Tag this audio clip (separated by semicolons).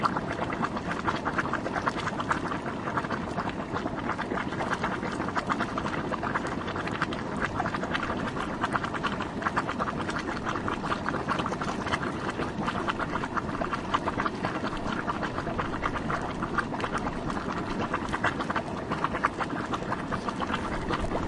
geothermal; hotspring; iceland; nature; field-recording